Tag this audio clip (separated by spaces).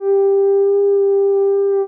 blowing conch vibration